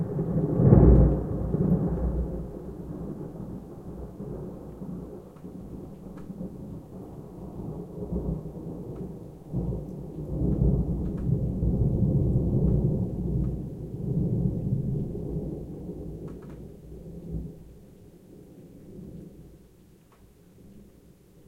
STE-050-quiet rumble thunder
Live recording of a thunderstorm with a long rumble.
recording, rumble, Thunder